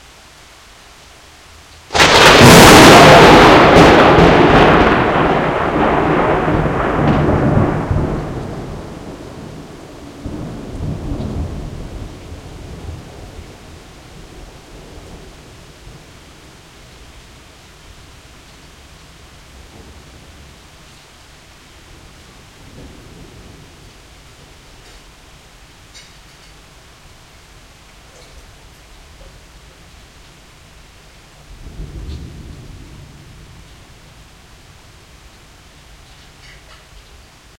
One of the thunderclaps during a thunderstorm that passed Amsterdam in the evening of the 16Th of July 2007. Meanwhile I'm doing the dishes. Recorded with an Edirol-cs15 mic. on my balcony plugged into an Edirol R09.
household, nature, thunder, thunderstorm, thunderclap, rain, field-recording